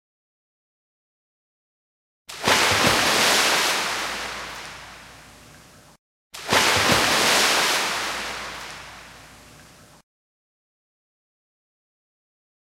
jump into water splash sound
The sound of someone jumping in the water pool.
splash, human, big, water, pool, jump, wave